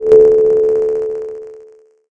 Kicking-effect-9
8-bit, rhythmic-effect, kicks, glitch-effect, glitch